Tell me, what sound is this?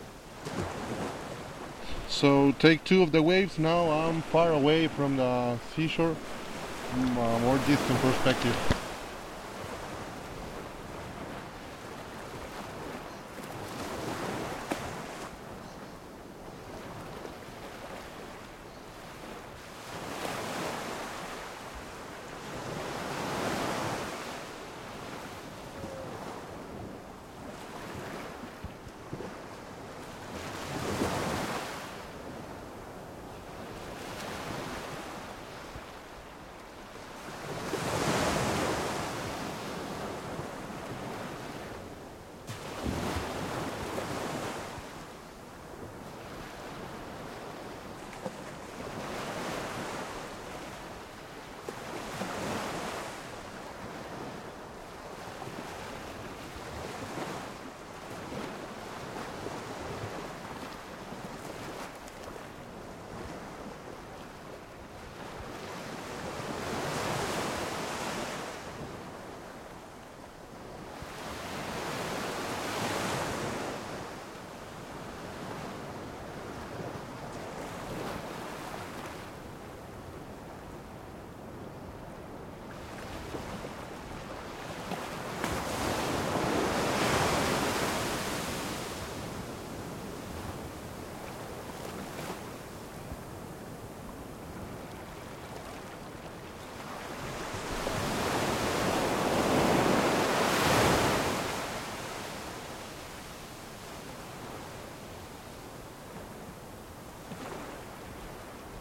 waves on a beach in costa rica, different perspectives, recorded with a sennheiser 416 on a zaxcom Deva V
playa, beach, shore, sea, waves, coast, costa, olas, seaside, oceano, mar, ocean